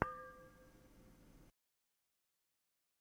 Megabottle - 26 - Audio - Audio 26
Various hits of a stainless steel drinking bottle half filled with water, some clumsier than others.
bottle, hit, ring, steel, ting